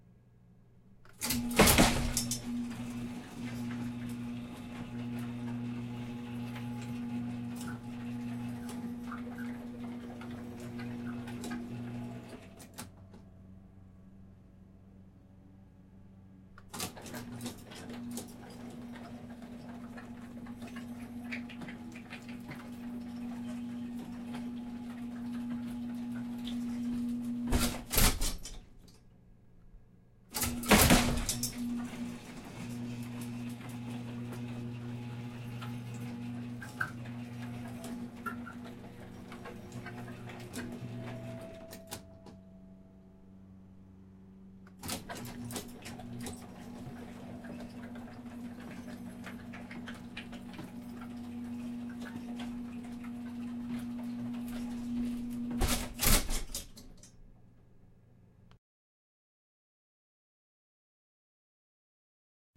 velociraptor tongue flicker